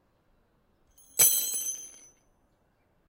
Metal nails falling: The sound of several long metal nails being dropped and impacting a hard ground, impact sounds. This sound was recorded with a ZOOM H6 recorder and a RODE NTG-2 Shotgun mic. No post-processing was added to the sound. This sound was recorded by someone dropping several long metal nails onto a hard ground on a quiet, sunny day, while being recorded by a shotgun mic.